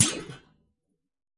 BS Hit 21
metallic effects using a bench vise fixed sawblade and some tools to hit, bend, manipulate.
Bounce, Clunk, Dash, Effect, Hit, Hits, Metal, Sawblade, Sound, Thud